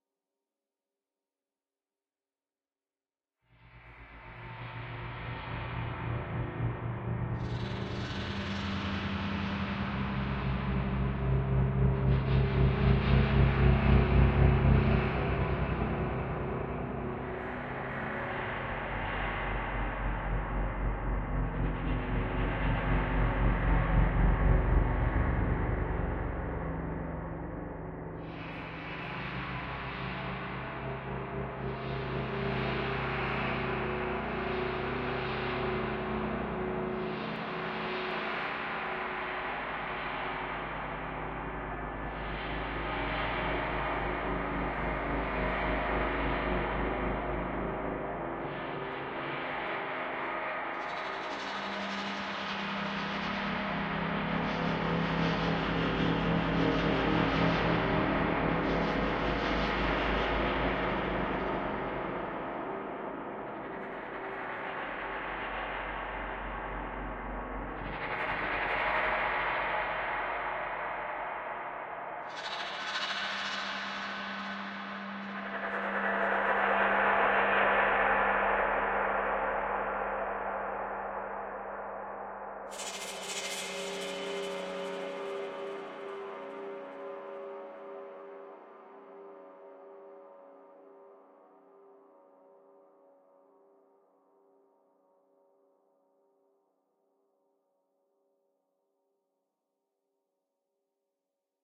Stuttering sound made in Live just from home recordings of my hand scratching a microphone